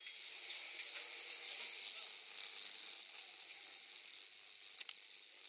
The sound that the security scanner makes when its scanning objects.